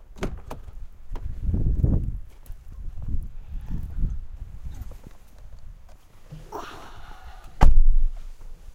Hyundai I30 Einsteigen bei Schneetreiben
Entering hyundai I30 in a heavy snow storm